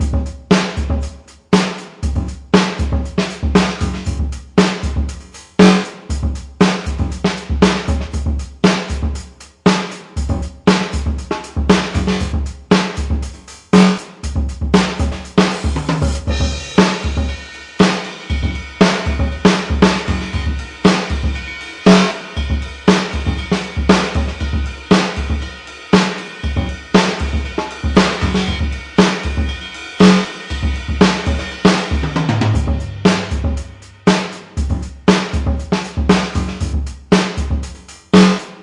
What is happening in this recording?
118 bpm - loop
fl studio and addictive drums vsti